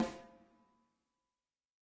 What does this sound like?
KBSD2 E22 VELOCITY1
This sample pack contains 63 stereo samples of a Ludwig Accent Combo 14x6 snare drum played by drummer Kent Breckner and recorded with a choice of seven different microphones in nine velocity layers plus a subtle spacious reverb to add depth. The microphones used were a a Josephson e22s, a Josephson C42, an Electrovoice ND868, an Audix D6, a Beyer Dynamic M69, an Audio Technica ATM-250 and an Audio Technica Pro37R. Placement of mic varied according to sensitivity and polar pattern. Preamps used were NPNG and Millennia Media and all sources were recorded directly to Pro Tools through Frontier Design Group and Digidesign converters. Final editing and processing was carried out in Cool Edit Pro. This sample pack is intended for use with software such as Drumagog or Sound Replacer.
dynamic, drum, combo, sample, reverb, layer, ludwig, velocity, accent, mic, kent, mics, snare, drums, samples, electrovoice, beyer, stereo, microphone, 14x6, audix, josephson, multi, microphones, technica, breckner, layers